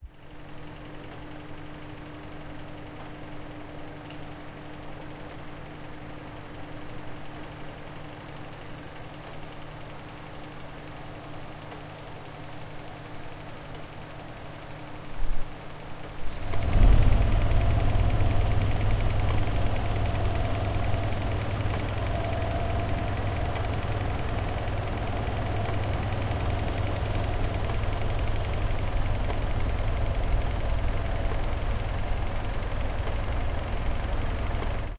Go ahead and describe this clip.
fan far near
Raw sound of an electric home fan in two takes, far and near the fan. Captured in a middle size living room (some reverb) with zoom H4n. Normalized/render in Reaper.
air, fan, ventilator